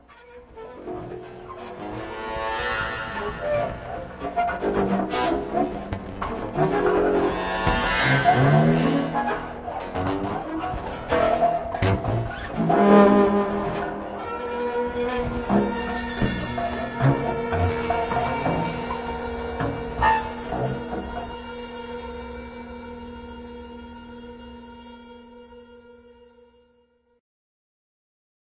Electroacustic bass played with bow and using a third bridge